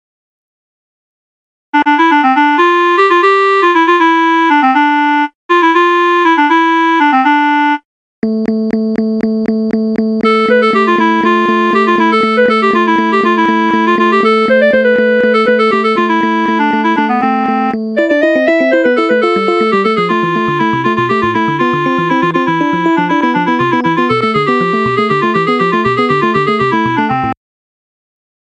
Crazy 8-Bit Piano
A random piano piece I just created out of boredom. Can be looped.
Created in 3ML Piano Editor
I'm not actually a good pianist but I guess this turned out a bit fine. XD
8-bit, 8bit, arcade, crazy, game, random, retro, video, videogame